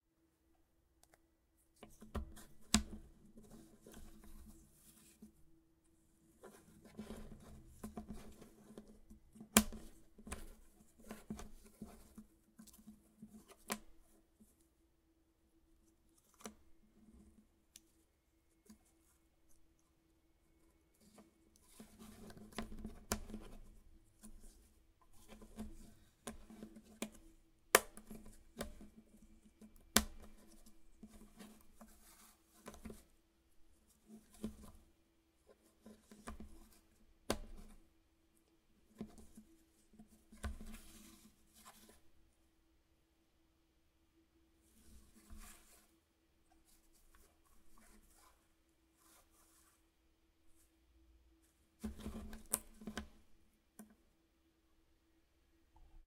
Puzzle Pieces Foley Mvmt Wood Slide Up Down 01
Foley movement of puzzle pieces being slid around, picked up and clicked into place on a wood surface. I used a Zoom H4n and applied some noise reduction to get the room tone out. Very specific sound, hopefully gets some more use out of it.
Down, Pieces, Puzzle, Mvmt, Slide, Wood, Up, Foley